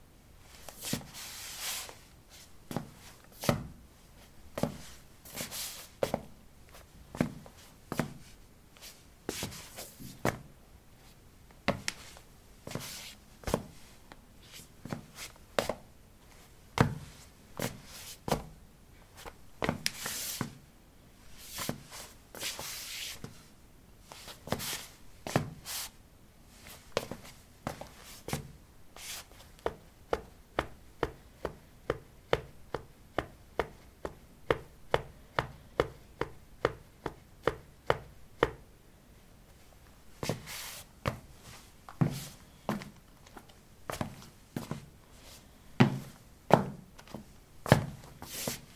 ceramic 13b sportshoes shuffle tap threshold
Shuffling on ceramic tiles: sport shoes. Recorded with a ZOOM H2 in a bathroom of a house, normalized with Audacity.